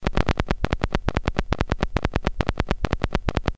click of a needle on an old record (different yet again)
click, detritus, field-recording, glitch, hiss, turntable, vinyl